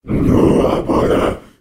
A sinister low pitched voice sound effect useful for large creatures, such as demons, to make your game a more immersive experience. The sound is great for making an otherworldly evil feeling, while a character is casting a spell, or explaning stuff.
arcade, brute, deep, Demon, Devil, fantasy, game, gamedev, gamedeveloping, games, gaming, indiedev, indiegamedev, low-pitch, male, monster, RPG, sfx, Speak, Talk, troll, videogame, videogames, vocal, voice, Voices